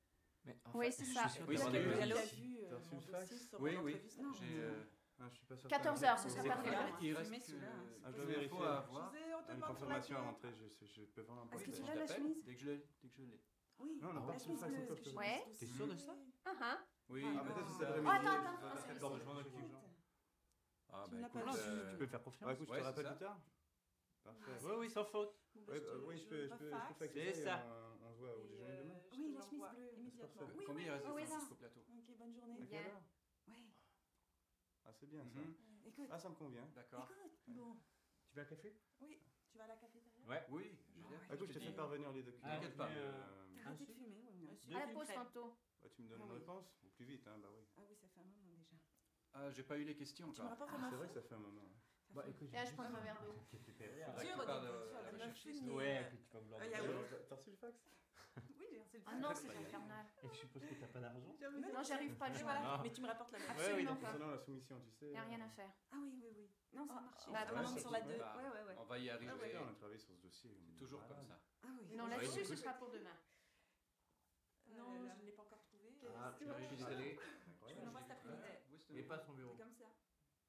Interior vocal (French) ambiences: at the office
interior, localization-assets, office, vocal-ambiences, walla